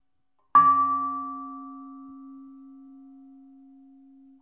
An impact on a train wheel with a contact mic